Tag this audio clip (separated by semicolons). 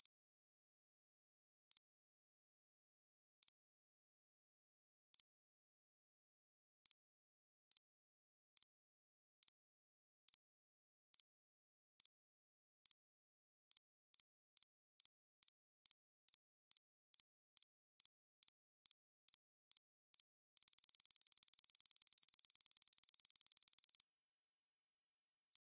beeping; synth; effect; biep; FX; countdown